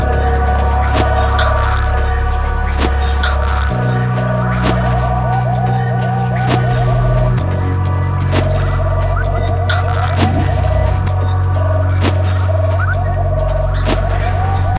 a bunch of owls - Song jump 4
Triphop/dance/beat/hiphop/glitch-hop/downtempo/chill
bass, chill, dance, down, drum, electro, experimental, glitch, instrumental, looppack, tempo, trip